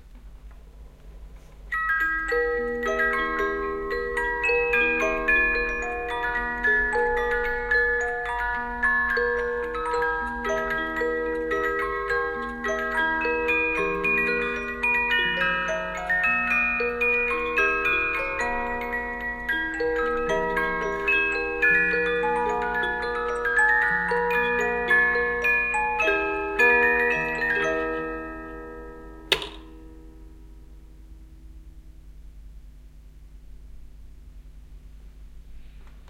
About 100 year old music-box Symphonion playing the waltz "Wien bleibt Wien". Recording devices: Edirol R-09, OKM II stereo microphones.